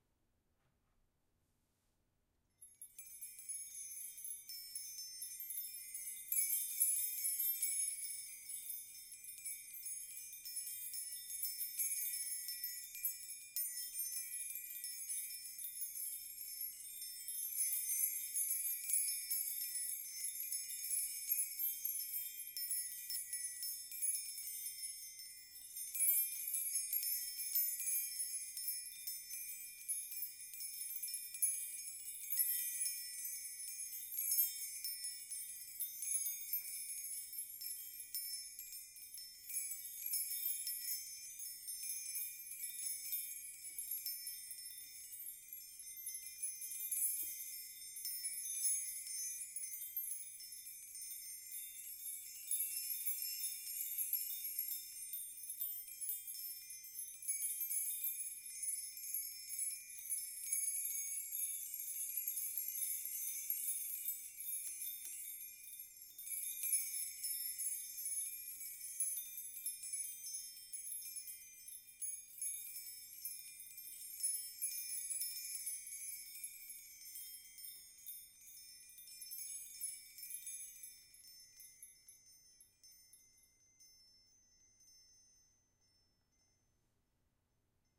Close-mic of a chime bar made from various size house keys. This was recorded with high quality gear.
Schoeps CMC6/Mk4 > Langevin Dual Vocal Combo > Digi 003